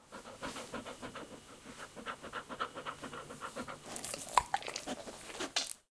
09-Dog breathing
Deep breaths of dog